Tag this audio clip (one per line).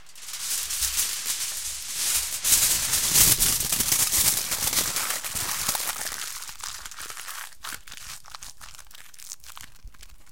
aluminium-film
metalic
noise